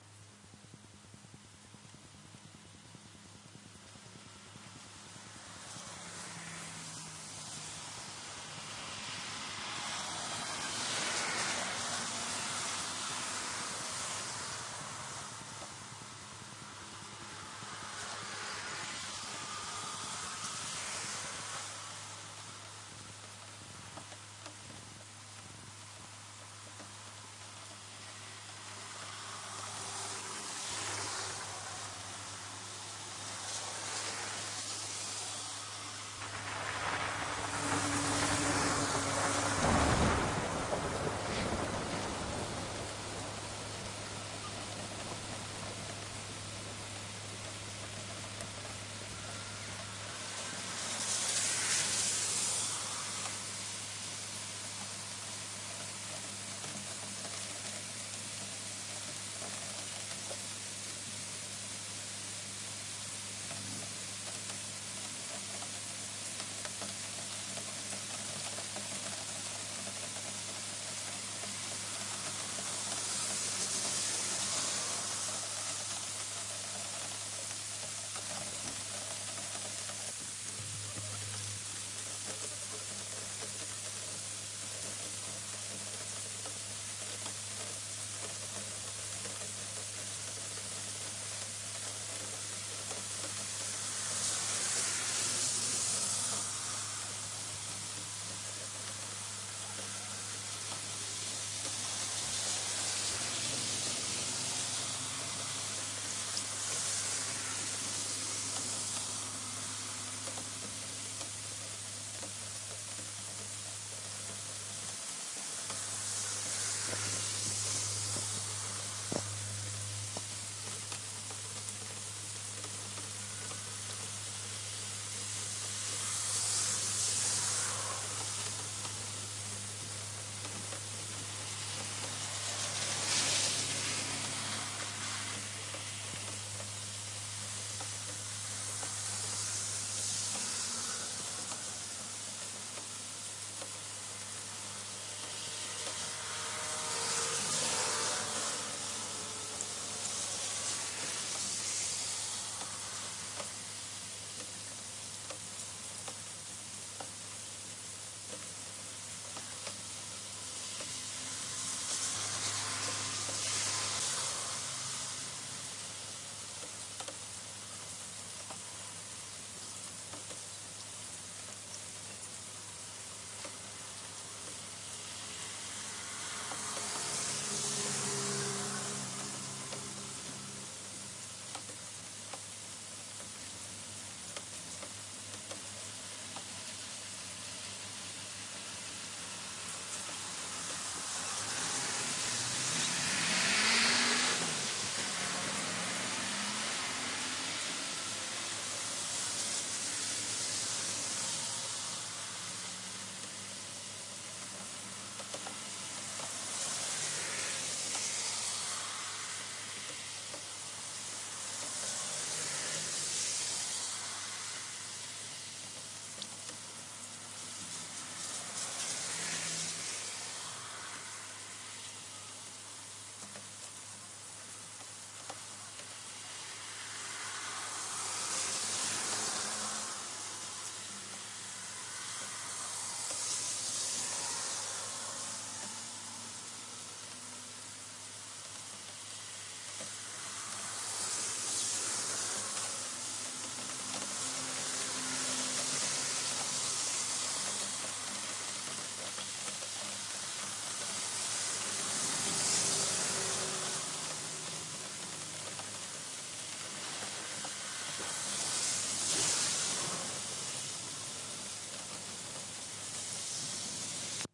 19940621 Rain from02 50am
Sound was recorded 21.06.1994. Record was started at 02:00.
This is additional part for previous 2 parts. It was recorded on B-side of the tape.
Used 2 soviet dynamic mics МКЭ-9, mixing console ПМ-01 and reel tape recorder (don't remember, but it's may be "Сатурн 202С-2" or "Иссык-Куль МПК 101-1С Hi-Fi").
Digitized from "Олимп-004" ("Olimpus-004") soviet reel tape recorder, M-Audio Fast Track Ultra 8R.
AB-stereo.
rain-drops, night-rain, soviet, Omsk, noise, street, reel-tape-recorder, rain, car, night, USSR